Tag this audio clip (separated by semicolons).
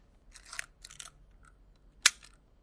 Canon; camera; old-camera; Canonet; photography; photo; slr; fotografia; shutter; foto